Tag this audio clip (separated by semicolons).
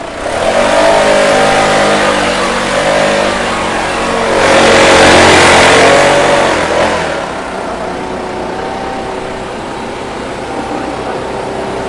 MOTOR; machine; Compressor; leaf-blower; Hum; mechanical; machinery